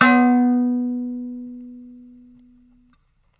Tones from a small electric kalimba (thumb-piano) played with healthy distortion through a miniature amplifier.
amp, bleep, blip, bloop, contact-mic, electric, kalimba, mbira, piezo, thumb-piano, tines, tone